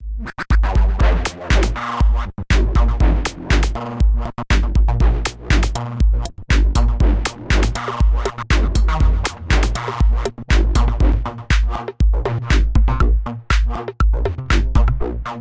Vocal Like Beats 2
A beat that contains vocal-like formants.
Complex, Filter, Vocal-like, Beat, formant